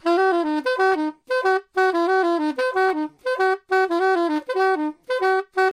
Free jazz style.
Recorded stereo with L over the middle of sax and R to the bell mouth.
Listen free improvisations to: